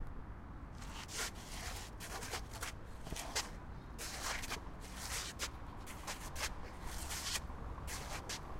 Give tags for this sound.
Crowd
Feet
Footsteps
Group
Shuffling
Zombie

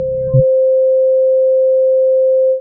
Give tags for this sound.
bass
multisample
ppg
sub
subbass

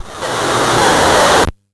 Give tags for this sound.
16
44
from
hifi
homekeyboard
lofi
sample

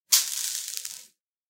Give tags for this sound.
agaxly
cave
crumble
dirt
dust
gravel
litter
scatter

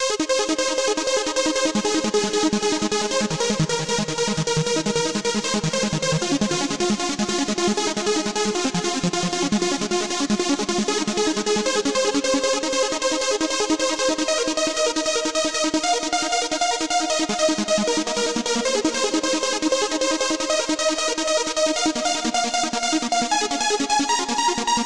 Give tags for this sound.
lead
trance

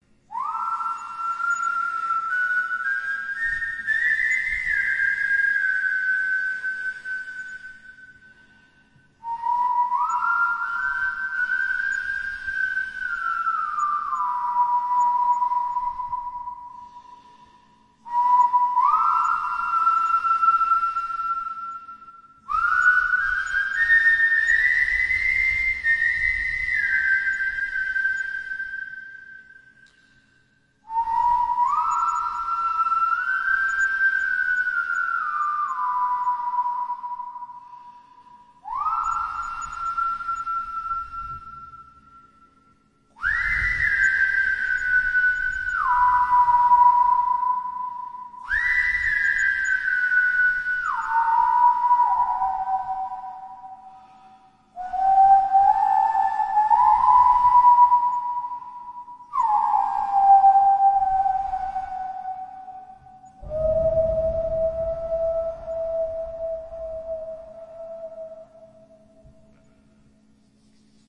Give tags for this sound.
soud-effect
suspense
whistle

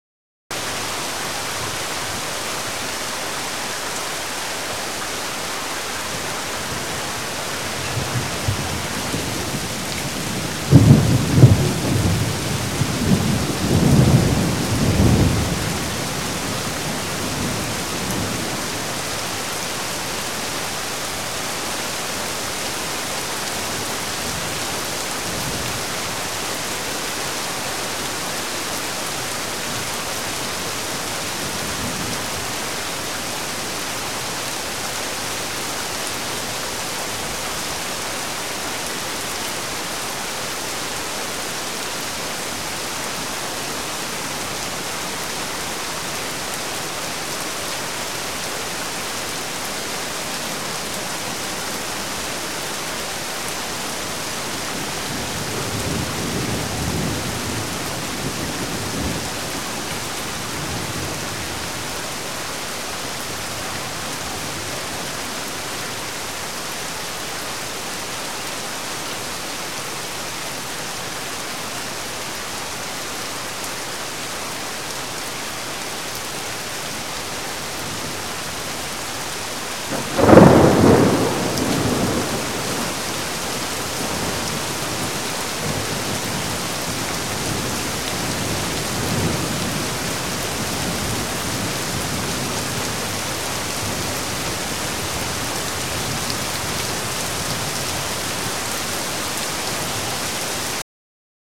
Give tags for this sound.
nature
thunder-storm
storm
weather
thunder
ambient
strike
rainstorm
lightning
ambience

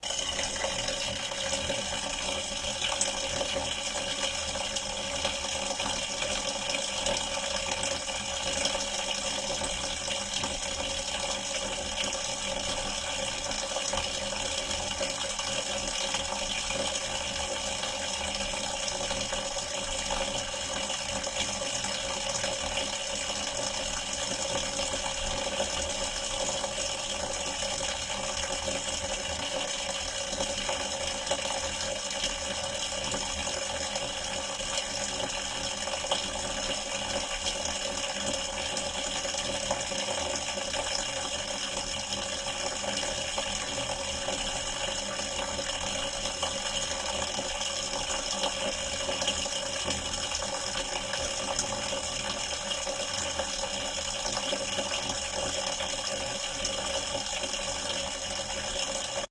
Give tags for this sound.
ambient
field-recording
movie-sound
pipe
sound-effect
water
water-spring
water-tank

